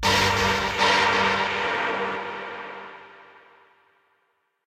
rancid synth bass